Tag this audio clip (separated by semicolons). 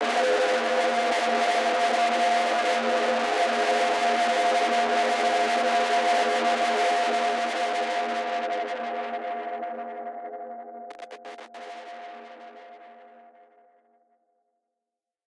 corpus; distorted